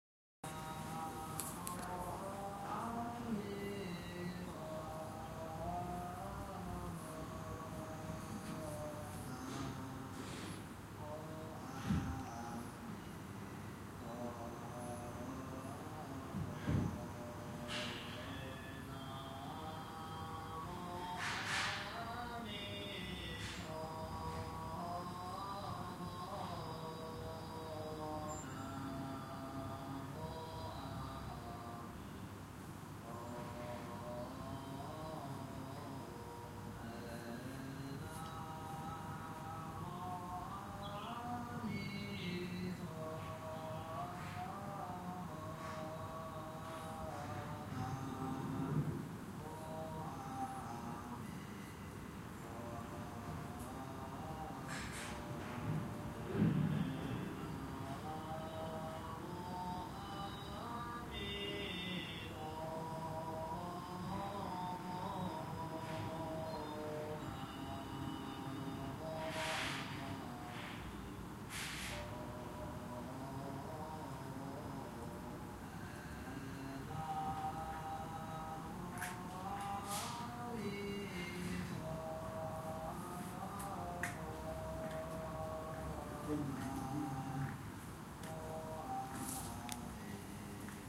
Monks chanting in Chi Lin nunnery, Hong Kong November 2018
During a hot day we found cool shade inside the nunnery where I recorded the chanting of the monks.
china, monk, chant, buddhist, chanting